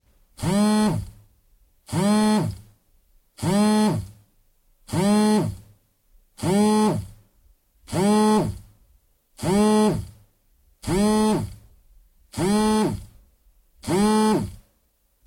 FX LuMu cellphone vibrating buzzing Huawei Y6 desk 500ms B
Cellphone / mobile phone vibrating on wooden desk
Model: Huawei Y6
Recorded in studio with Sennheiser MKH416 through Sound Devices 722
Check out the whole pack for different vibration lengths!